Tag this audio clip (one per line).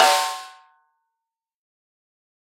multisample
snare
1-shot
velocity
drum